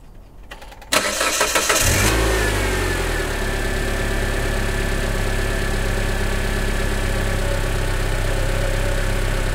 Citroën C-Crosser - engine starting (Zoom H6)
cars, Zoom, Citroen, engine, car